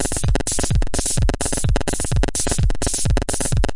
Description updated 9.3.23